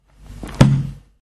Closing a 64 years old book, hard covered and filled with a very thin kind of paper.
household, percussive, loop, noise, book, paper, lofi